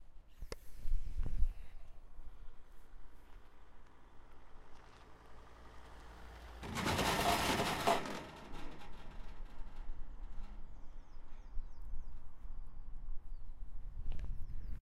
car goes over cattle grid 2
A car driving over a cattle grid.
Car, Countryside, Motoring